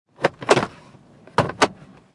MITSUBISHI IMIEV electric car GLOVE COMPARTMENT
electric car GLOVE COMPARTMENT